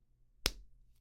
Sonido de un puño
Sound of a punch